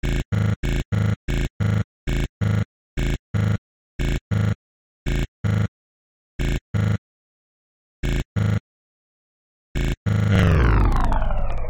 8 bit heart beat
Heart beat for game